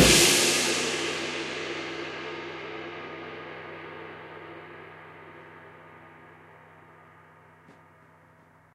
04 Crash Loud Cymbals & Snares
click, crash, custom, cymbal, cymbals, drum, drumset, hi-hat, Maple, metronome, Oak, one, one-shot, ride, Rosewood, shot, snare, sticks, turkish